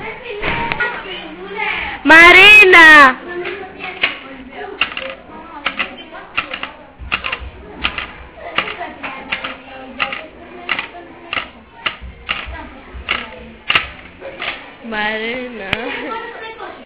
Sonicsnaps-49GR-marina-click
Sonicsnaps made by the students at home.
Greece, click, sonicsnaps, 49th-primary-school-of-Athens